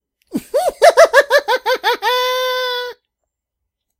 Evil Laugh 2
A nice short demented laugh
laughter, mad, crazy, psychotic, laugh, insane, evil, demented, male